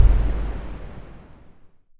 A boom sound I made using Audacity.